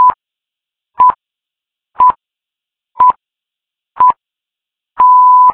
The Pips
Simulation of the 'pips' heard at the top of the hour on BBC radio stations here in the UK. It gives an accurate time signal from Greenwich in London, on the prime meridian. Five 0.1 second beeps, then a 0.5 second beep marking the top of the hour. Created in Audacity, January 2015.
the-pips, bleep, greenwich-time-signal, bbc, pip, time, pips, beeps, precise, radio, bleeps, time-signal, signal, beep, news, greenwich, 1kHz